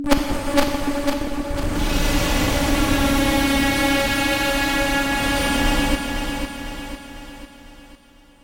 Freed-back - 13
Noisy effects made from speaker-mic feedback. Specifically, putting a mic into a tincan, and moving the speakers around it.